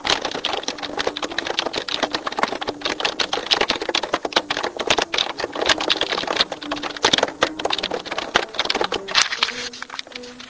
This is fast typing.